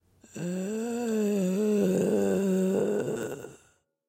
SZ Zombies 01
A real zombie moan. Recorded from a live zombie.
zombie, moan, vocal, throat